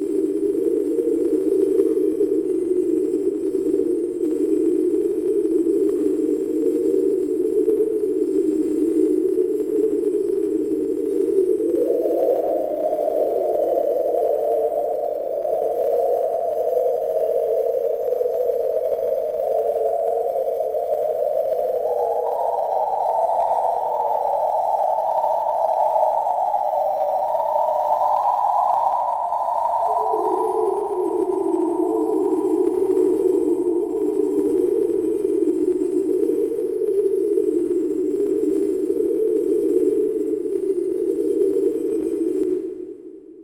Picture the great cacophony of the 2001 intermission and the monolith sound, well there you go.